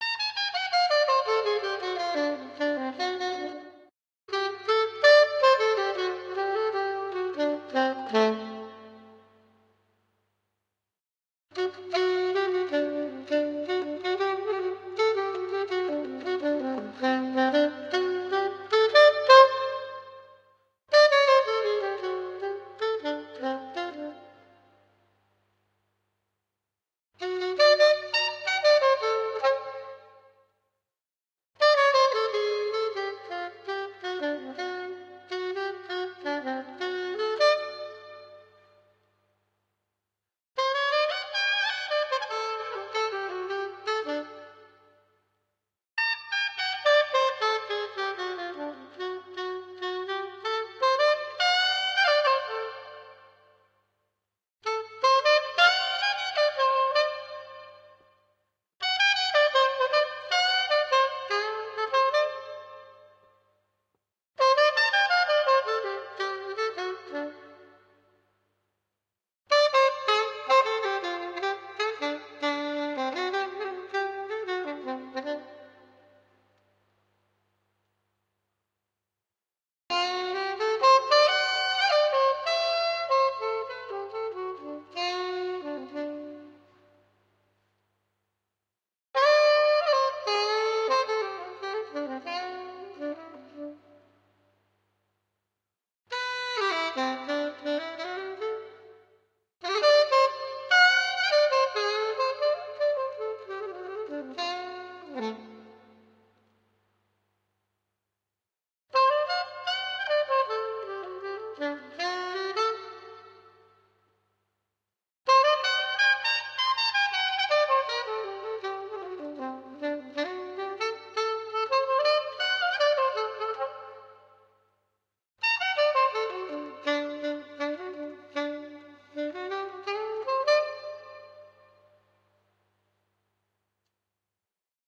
soprano sax solo MASTER
Well, for the benefit of Mr Kite, here is a sample clip of audio of myself playing the soprano sax. I was a semi-pro player for many years and always kept an archive of audio clips. Enjoy.
funk, jazz, sample, sax, saxophone, soprano